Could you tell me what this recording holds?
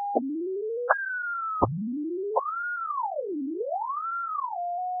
I took some waveform images and ran them through an image synth with the same 432k interval frequency range at various pitches and tempos.
pattern, synth, loop